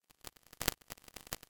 ifs-20220101-6-chaos
Noise made by interpreting an 1D fractal as an audio signal (more density = higher amplitude). Rendered via chaos game from a recurrent IFS.